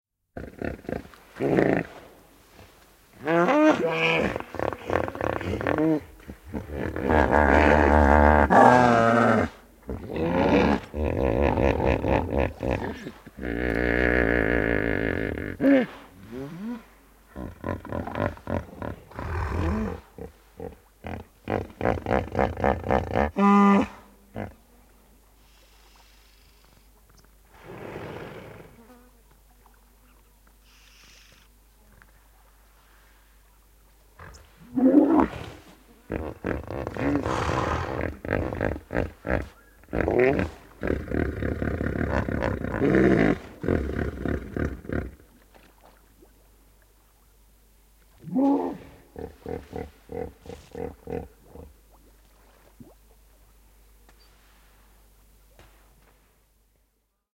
Virtahevot ääntelevät / Hippopotamus, hippos making sounds nearby in the river
Virtahevot ääntelevät joessa lähellä. Hiukan veden ääniä.
Paikka/Place: Sambia / Luangwan kansallispuisto/ Luangwa National Park
Aika/Date: 01.09.1976
Africa, Afrikka, Field-Rrecording, Virtahepo, Yleisradio